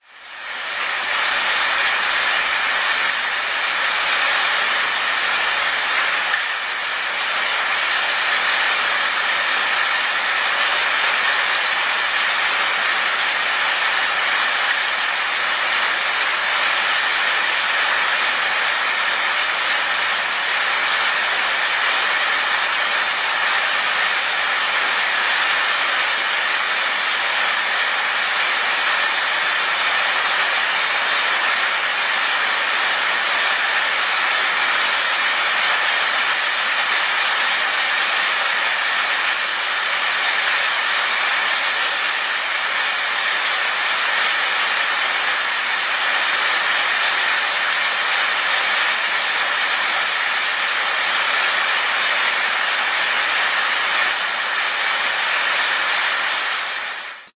06.05.2010: about 14.30. inside the stonemason's workshop in Czerwonak (the twon near of Poznan/Poland) on Koscielna street. The very noisy sound of beating down of rain on the metal roof of the workshop.
beating-down-of-rain, field-recording, metal-roof, noise, poland, poznan, rain